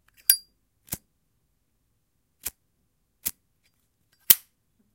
Zippo Lighter open fail to light close
Short sequence of a zippo lighter being opened, it failing to light, and it being closed.
Recorded with a Tascam DR-05 Linear PCM recorder.